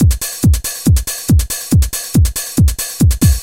Drumloop Dance (basic) - 2 bar - 140 BPM (no swing)
techno, drums, dance, loop, drum-loop, rhythm